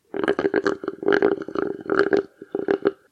slurp-shake
The sound of finishing a shake.
bubble, suck, slurp, slurping, cup, drinking, drink, eating, shake, food, rude, eat, hungry, foley, cartoon, straw